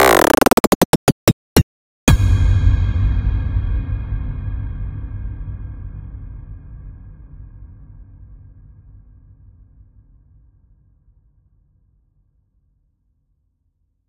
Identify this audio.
Exponential impact
exponential, impact, reverb